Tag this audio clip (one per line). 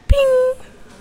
Central-Station
Meaning